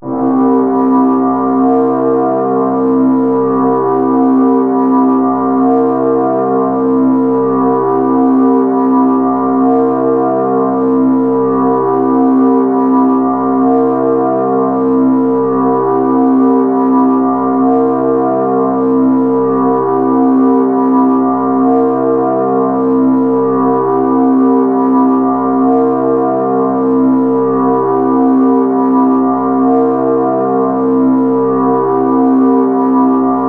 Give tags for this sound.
ambient
artificial
drone
soundscape

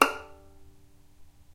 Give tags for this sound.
non-vibrato
pizzicato
violin

